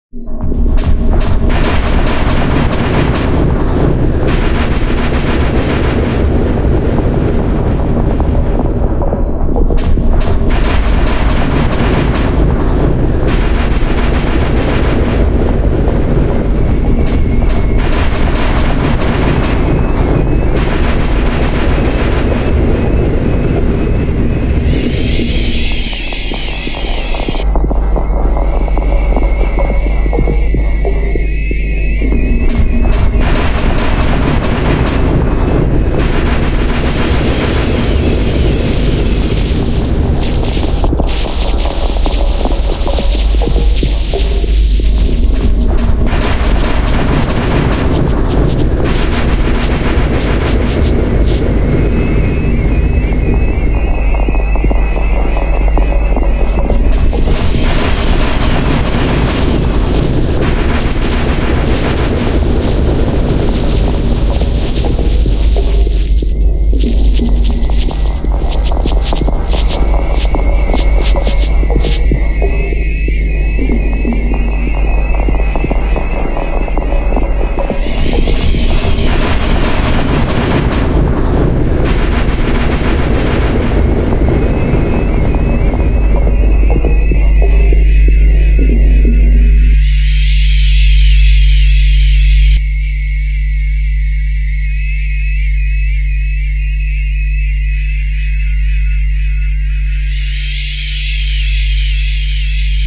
old navy glory